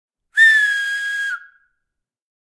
Whistle, Finger, Long, A
I was doing some recording in a large church with some natural reverberation and decided to try some whistling with 2 fingers in my mouth. This is one of the longer whistles.
An example of how you might credit is by putting this in the description/credits:
The sound was recorded using a "Zoom H6 (XY) recorder" on 22nd March 2018.
long, whistling, finger